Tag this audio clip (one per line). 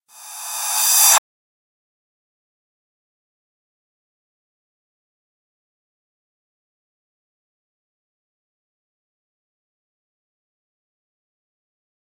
metal
reverse
fx
cymbals
echo
cymbal